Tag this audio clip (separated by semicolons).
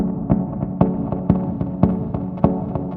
design; electronic; experimental; line; loop; music; oneshot; pack; sample; sound; stab; synth; techno